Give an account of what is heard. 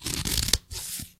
scissors cut 7
Scissors cutting through several layers of paper